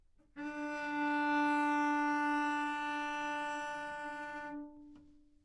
Part of the Good-sounds dataset of monophonic instrumental sounds.
instrument::cello
note::D
octave::4
midi note::50
good-sounds-id::2711
Intentionally played as an example of bad-richness-sultasto